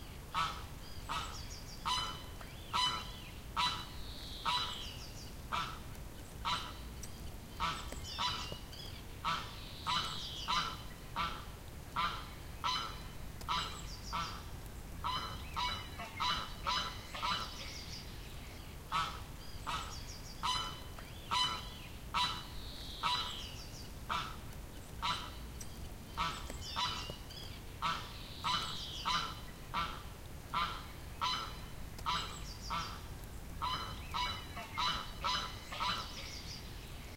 Duck quack 1 Sweden
A duck quacking in the water by the sea in Eastern Sweden. Recorded in April 2013.
quack, duck, April, quacking, spring, Ducks, Sweden, bird, sea